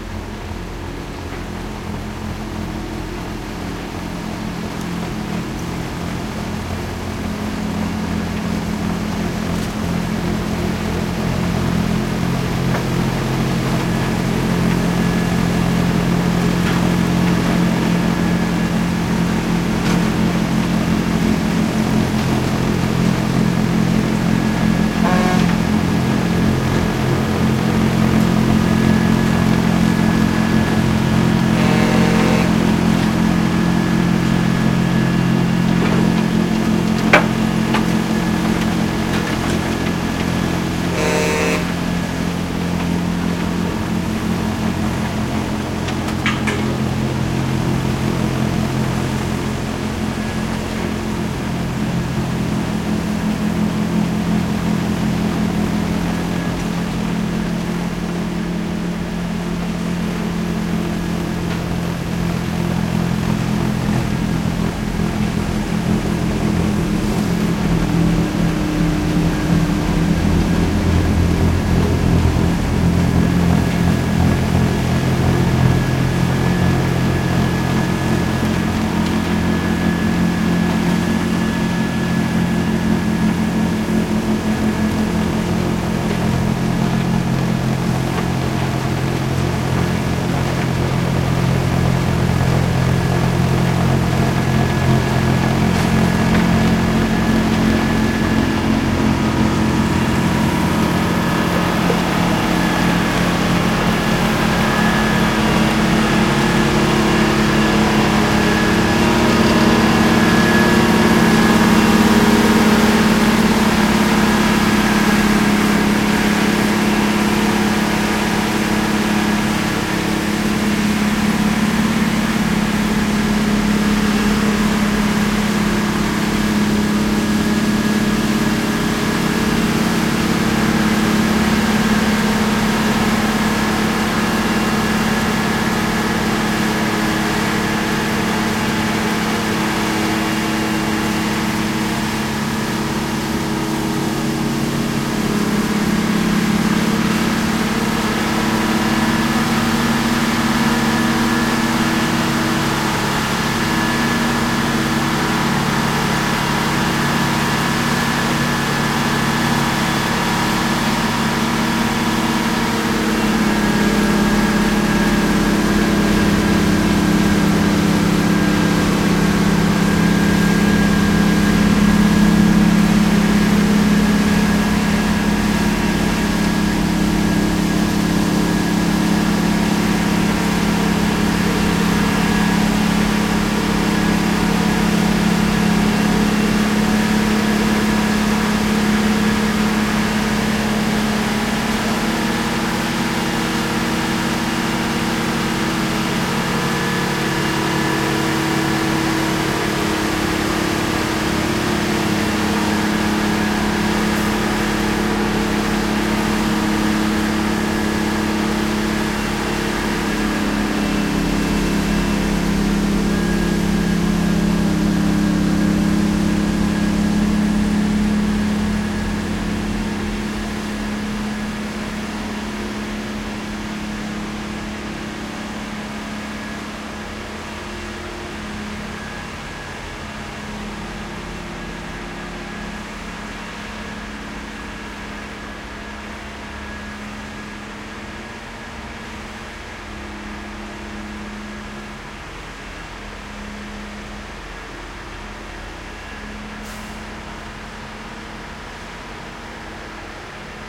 snow blower close follow and leave Montreal, Canada
blower, close, follow, leave, snow